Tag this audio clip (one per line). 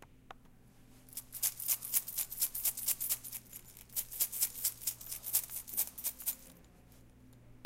indoor
household
home
room